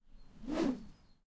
Raw audio of me swinging bamboo close to the recorder. I originally recorded these for use in a video game. The 'C' swings are much slower.
An example of how you might credit is by putting this in the description/credits:
And for more awesome sounds, do please check out my sound libraries.
The sound was recorded using a "H1 Zoom recorder" on 18th February 2017.